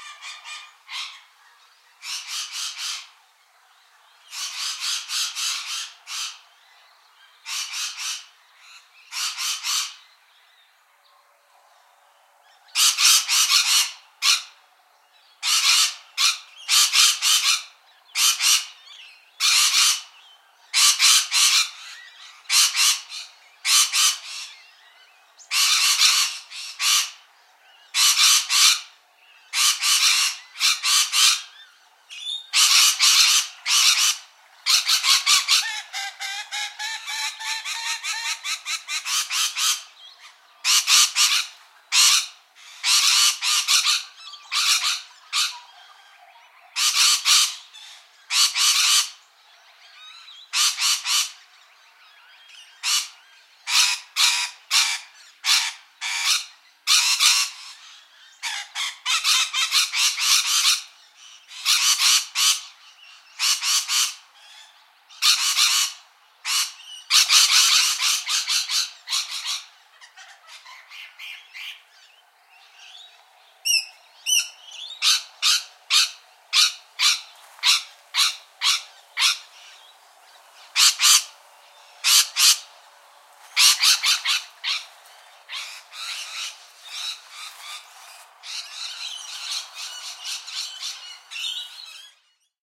Parrots Lorikeets
The (noisy) sound of a couple of Rainbow Lorikeets (Trichoglossus haematodus) eating sunflower seeds from a feeder hung in a tree in my back yard. They were fending off some Rosella parrots who got there first. So these are the territorial/aggressive sounds of the Lorikeet, not much different from the happy/friendly Lorikeet :)
trichoglossus-haematodus, noisy, bird, h-moluccanus-subspecies, screech, avian, australian, squak, t, lorikeet, parrot